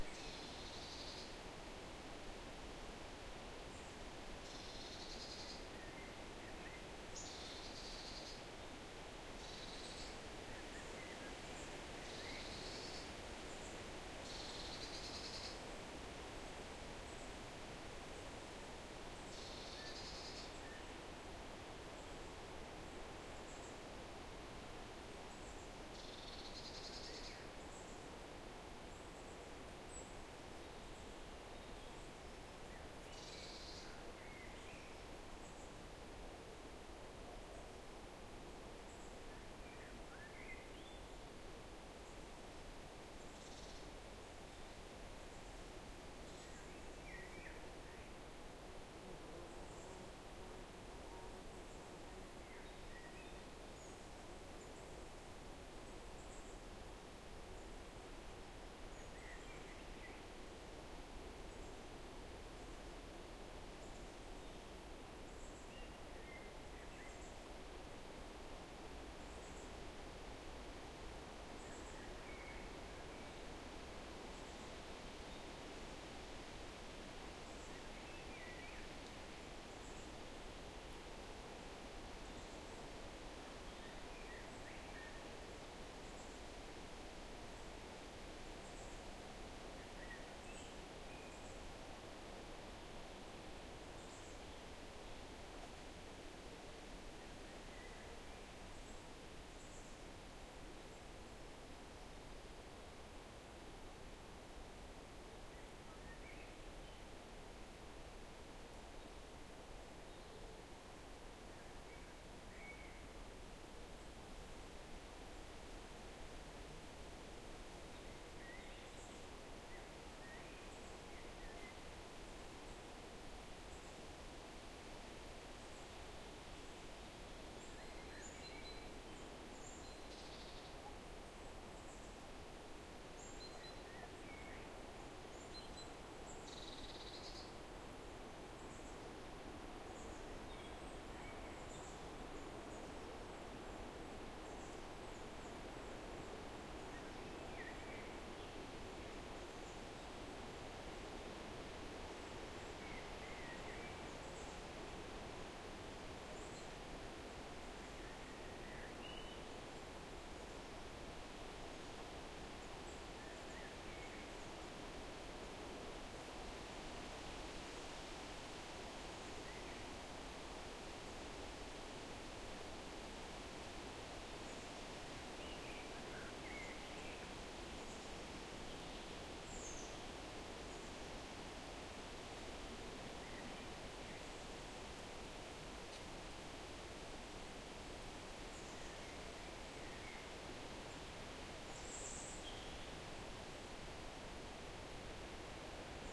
Forest wind and birds
Record of windy summer forest
ambient, bird, birds, breeze, calm, day, field-recording, forest, nature, spring, summer, trees, wind, windy